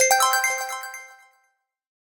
Jingle Win Synth 04
An uplifting synth jingle win sound to be used in futuristic, or small casual games. Useful for when a character has completed an objective, an achievement or other pleasant events.